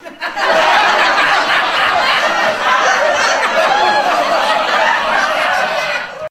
Laughing Audience
Recorded with Sony HXR-MC50U Camcorder with an audience of about 40.
mob, laughing, laughter, audience, crowd